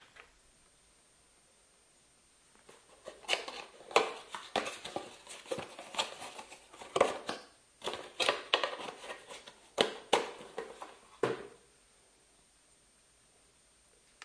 Opening an paper box in front of me on a table. OKM binaurals, preamp unto Marantz PMD671.